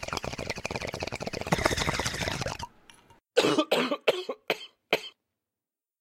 Bong Hit and Cough
compilation, cough